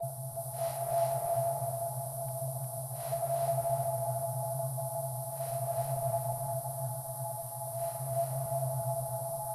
4X low brushby
Cross fading highs over rumbling lows. It loops okay.
Just scraping a guitar string and adjusting it in Audacity.
brush, effect, pan, sfx, sound, sweep, swish, swoosh, whoosh